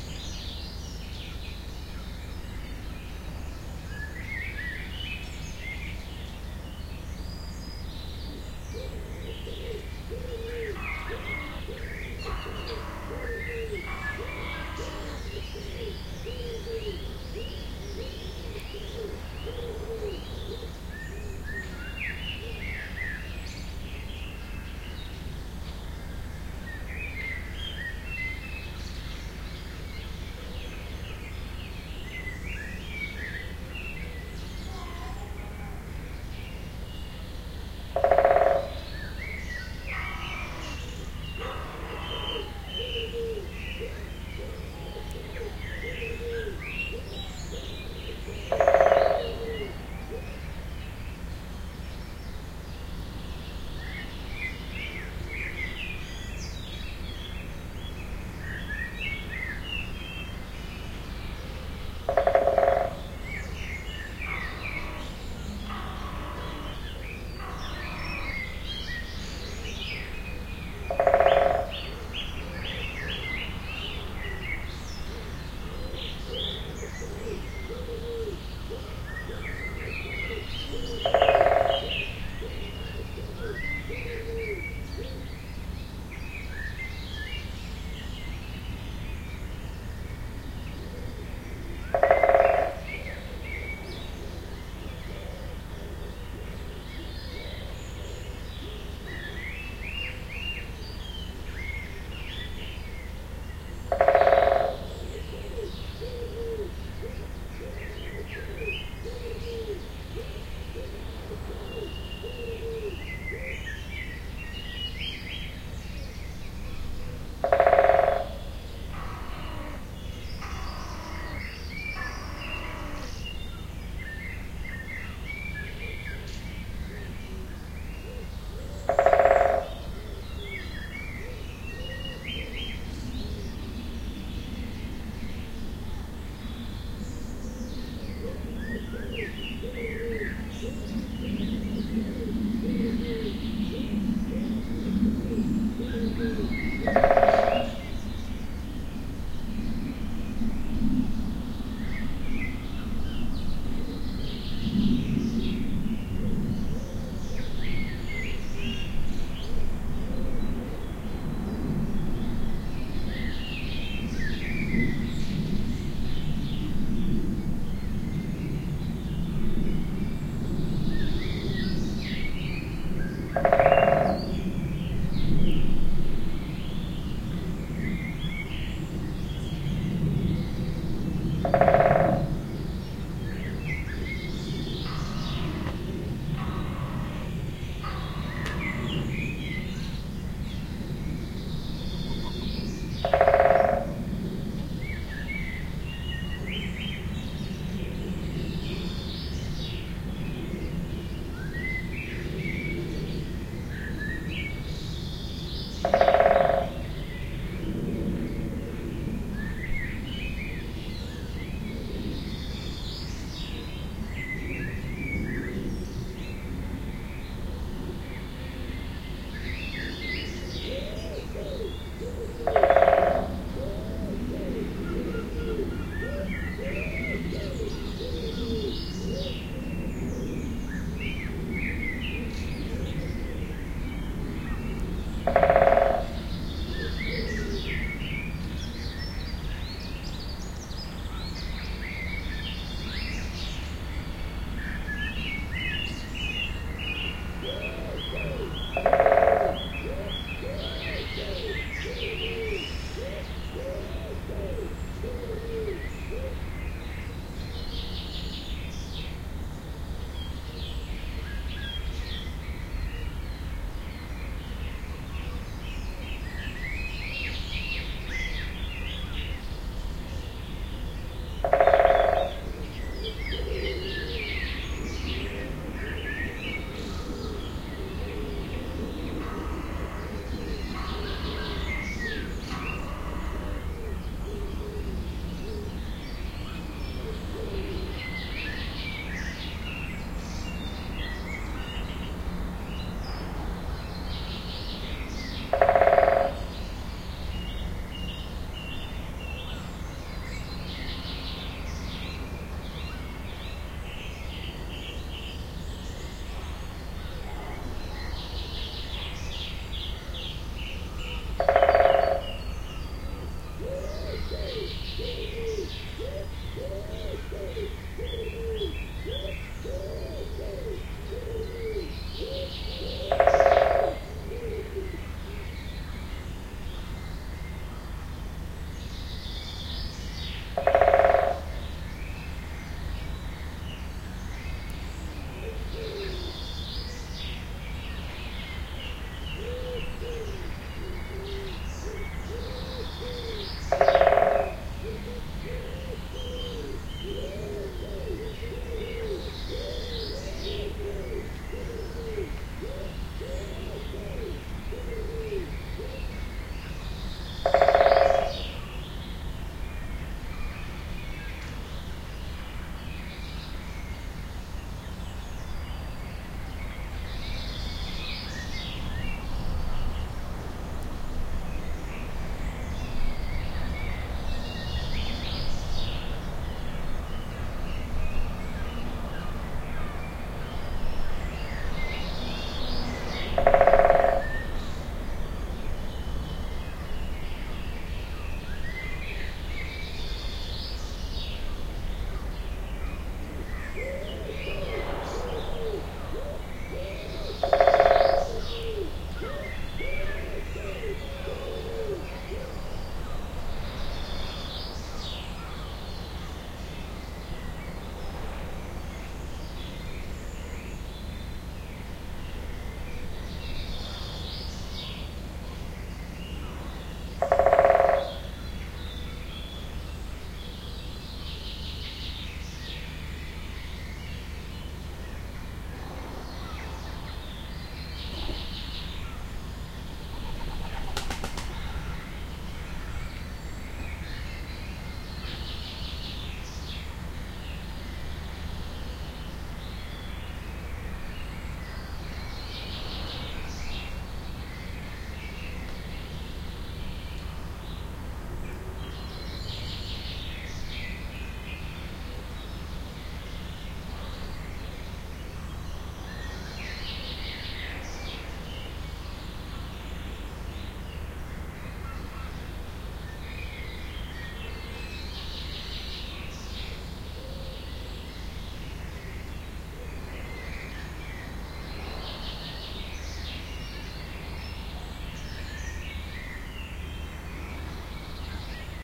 3D DIY binaural dummy head recording. Intended for headphone listening.
Bird sounds from my garden and adjoining woods around dawn this morning, notably a woodpecker pecking wood.
Other sounds - cat meowing at me (back, near start), airliner (back left to front right ~10km+,), pigeon flapping wings (inc. up, towards end), train (right ~2km.), scafolding? being moved, and some traffic (right ~1km.) Woods mainly front and left, house and hillside behind, town right (1-2km.),slightly down.
Very demanding soundstage to reproduce with wide range of distances, directions, and frequency bands. Some sounds have very limited bandwidth (eg. HF air attenuation at 1Km.+ ) making localization more tricky. Good test for headphone imaging.
Best on headphones, but works quite well on stereo speakers.
Roland Quad Capture to PC.
Unfortunately there is some audible hiss from new aux. mics, if played too loud.